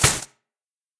Rock Finish
Metalic sound recorded with Olympus LS-12, edited in Reason 7 using impacts of dropping stainless steel into a bin of stainless steel. Recorded in Liberty, Missouri
Impact recorded and edited in Reason 7 using impacts of stomping on the floor, recorded with Sterling Audio SP50.
rock-finish, video-game